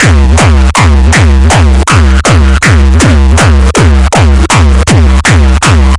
Dist Hard kicks
A set of distorted kicks. I added a bandpass filter and 2 random LFOs that control the filter cutoff. It's a very simple pattern, but only cut the kick you need and use it at the speed you want. I hope you like it, but hard kicks isn't my style and I'm haven't experience making kicks.